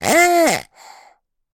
Squeaky pig dog toy single snort snorting grunt squeak oink (15)
One of a series of recordings of a squeaky rubber dog toy pig being squeazed so it grunts, urgent force
dog, plastic, rubber, squark, squeak, toy